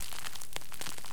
plastic - bubble wrap - vinyl crackle type sound after letting go of a wad of bubble wrap
Letting go of a wad of bubble wrap. I amplified it so that it is audible. The preamp noise from my recorder mixed with the crinkling from the bubble wrap sounded like a vinyl crackle.
air-bubbles, crackle, vinyl-crackle, vinyl, record-noise, plastic, packing-material, surface-noise, noise, record-crackle, air-bubble, packaging, crinkling, bubble-wrap, crinkle, packing